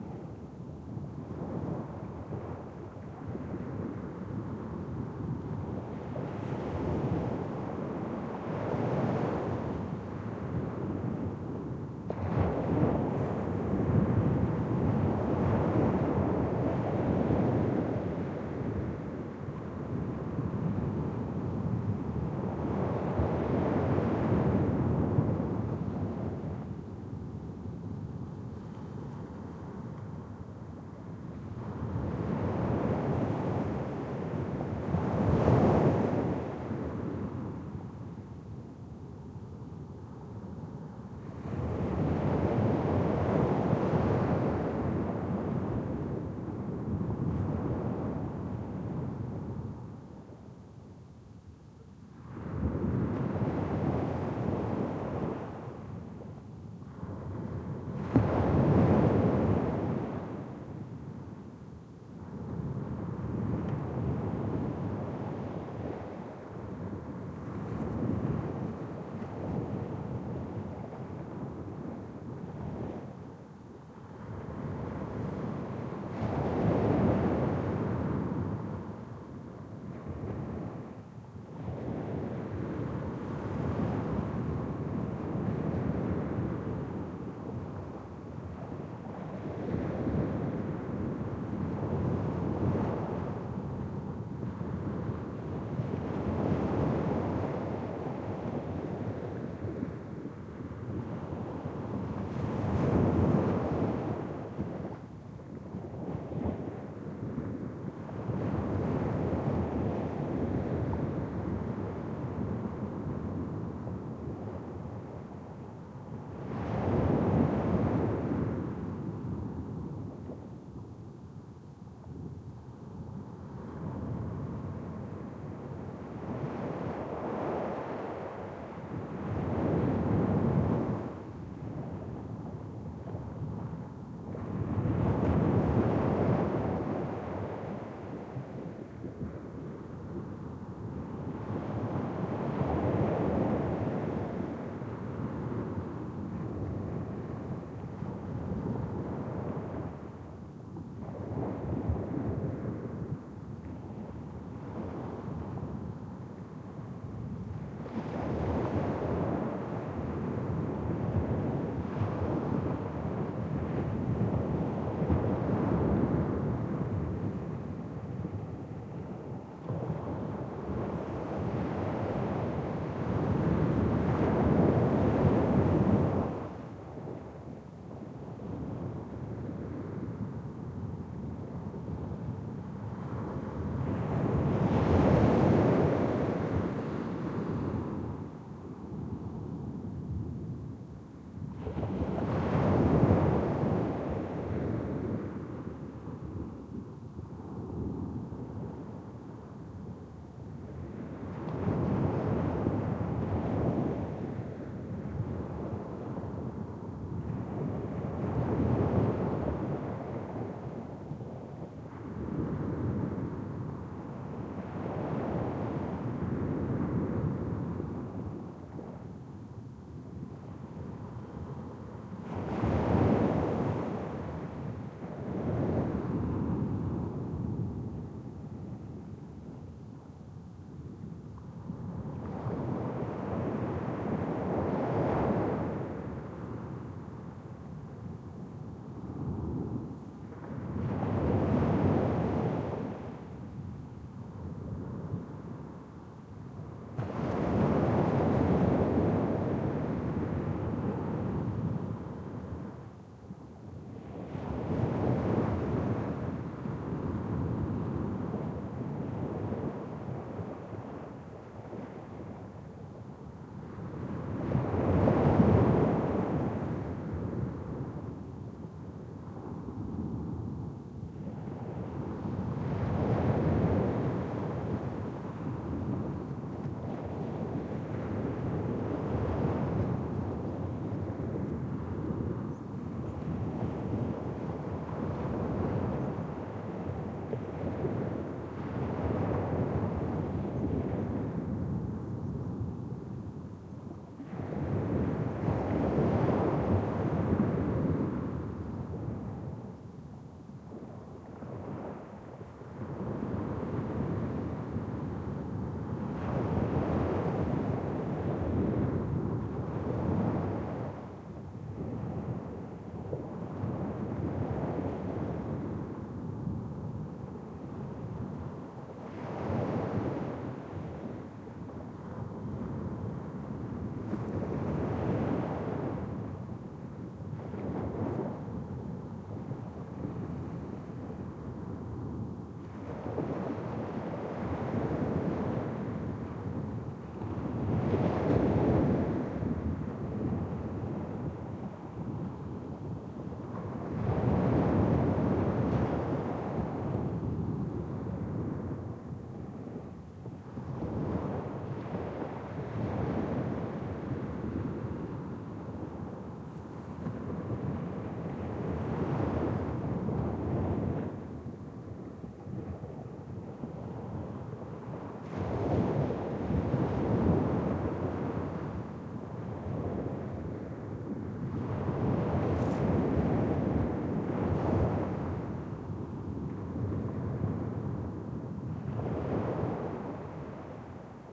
20121014 surf distant 08
Waves breaking on a sandy beach, recorded at some distance from source. Recorded on Barra del Rompido Beach (Huelva province, S Spain) using Primo EM172 capsules inside widscreens, FEL Microphone Amplifier BMA2, PCM-M10 recorder.